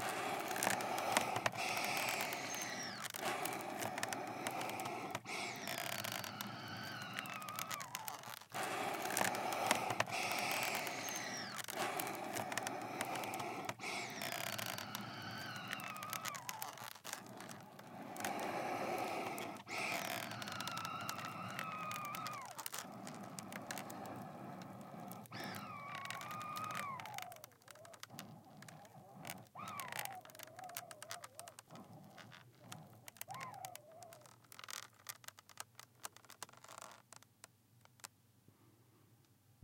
BREATHING SQUEEZE ORGAN
This is a children's accordion, recorded without pressing any keys, so it's just the creaky squeeze box breathing in and out air. Recorded for a horror which needed a creepy breathing effect.
Recorded on an SeX1 large condenser mic.
accordion, ADPP, breathe, breathing, creaking, horror, panting, rasping, sails, squeeze-box, ventilator